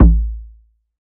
This is a sweet sounding kick hybrid single shot between an acoustic drum and an electronic synth kick. Very quick attack and nice frequencies.
acoustic, hybrid, kick, nice, synthesized